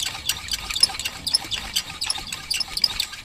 Swing loop 3
squeaky spinney thingy, acoustic recording, sampled and looped with a k2000. long loop
loop, rhythmic, percussion